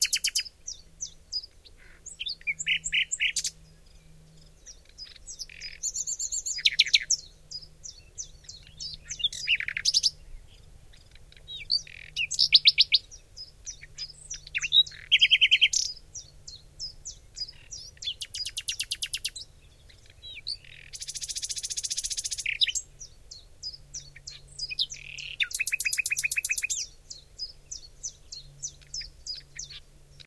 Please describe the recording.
Our various sounds for relaxing are very natural and soothing. These relaxing sounds provide soothing, realistic sounds, with no interjected music to interfere with the feeling of evasion that these nature sounds create. These sounds are really perfect and enjoyable, exceptionally tranquil and refreshing. These relaxing sounds are great for de-stressing. They are wonderful to listen to, especially when you are having a bad day, feeling stressed, or just want to relax.